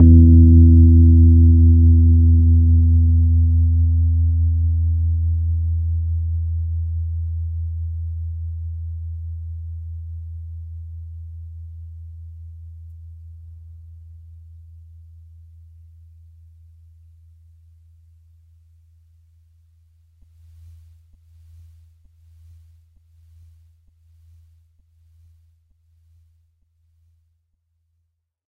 C S Rhodes Mark II E1
Individual notes from my Rhodes. Each filename tells the note so that you can easily use the samples in your favorite sampler. Fender Rhodes Mark II 73 Stage Piano recorded directly from the harp into a Bellari tube preamp, captured with Zoom H4 and edited in Soundtrack.
electric, fender, keyboard, multisample, piano, rhodes, tine, tube